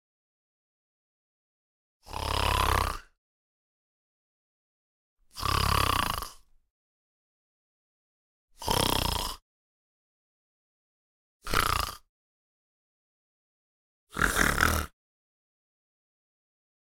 Various types of snores.